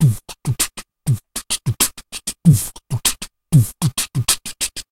Beat box 98BPM 02 mono
A lofi beatbox percussion loop at 98 BPM.
89BMP, 98-BPM, beatboxing, drums, lofi, loop, mono, percussion, rhythm